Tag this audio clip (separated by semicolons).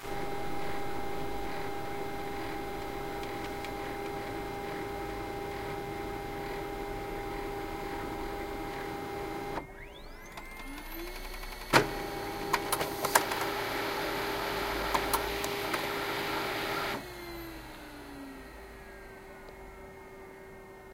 machines; field-recording